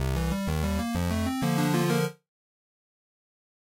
A short jingle that represents a successful action, end of level in a video game, or any other kind of job well done.